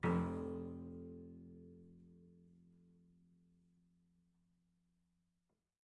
Tiny little piano bits of piano recordings
horndt, sound, sounds, piano, marcus, live, noise